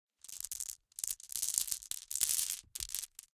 Glass marbles being poured back and forth from one hand to another. Grainy, glassy sound. Close miked with Rode NT-5s in X-Y configuration. Trimmed, DC removed, and normalized to -6 dB.
glass, hand, marble, pour, shuffle